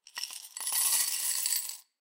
Icelandic kronas being dropped into a glass